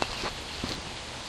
newjersey OC musicpier loopableelement2
Loopable snippet of sound from Ocean City Music Pier recorded with DS-40 and edited in Wavosaur.
boardwalk, loop, new-jersey, vacation